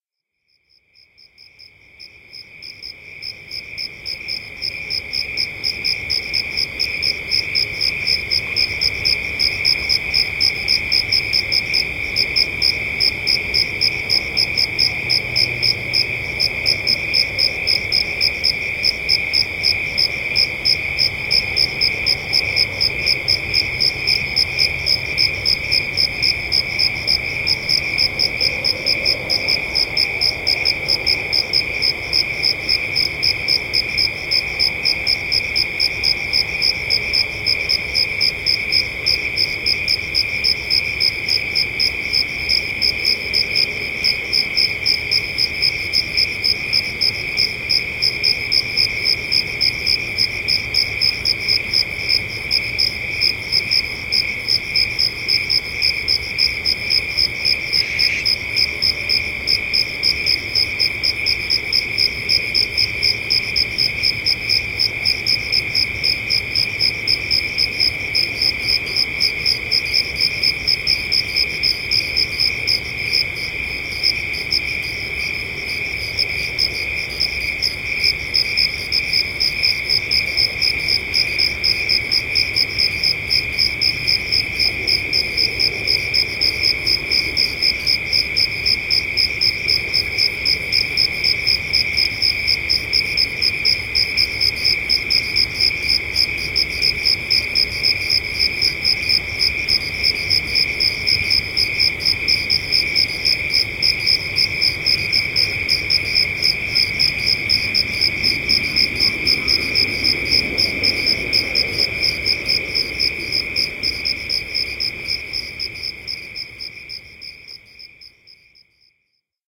sherman 29aug2009tr13
california, crickets